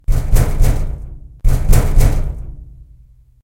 Knocking iron door1
knocking, door, knocks, knock